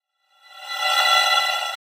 uplift, high, noisy, lushy, cinematic, processed, granular, pvoc, time-stretching, ableton, maxmsp, soundhack